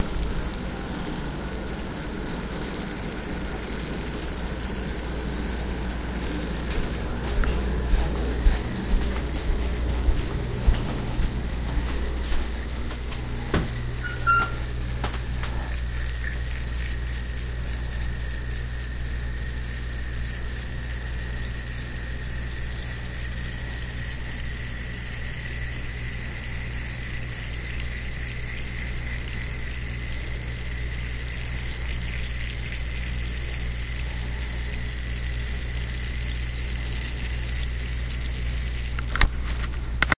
raw alkaseltzer or steak
What I thought might be a steak sizzling is actually alka seltzer, plop plop, fizz fizz.